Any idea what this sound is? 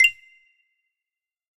Simple free sound effects for your game!
sound-effect, game, effect, sfx, videogame, sound, blip